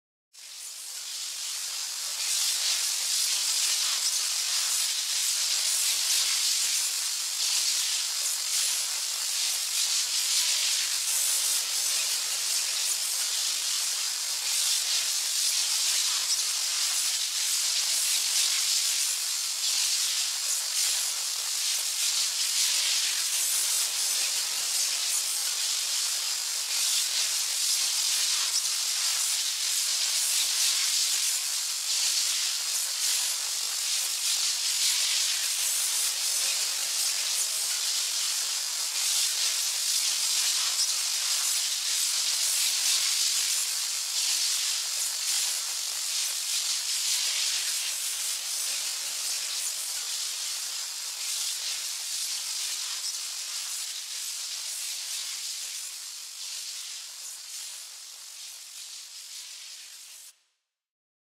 Electricity, Arcs, Sparks, long.
sparks, spark, buzz, electricity, electric